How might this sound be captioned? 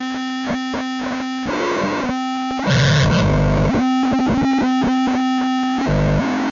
circuit bending fm radio
bending, circuit, fm, radio